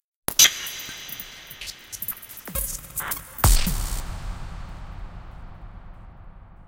A collection of heavily processed drums (mangled, reverb, hi-contoured)
drums, dub, reverb-experiments